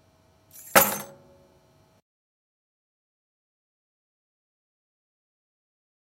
Dropped Keys
Recorded on garageband. The sound of my keys being dropped on a metal table before any editing.